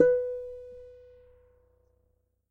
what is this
my mini guitar aria pepe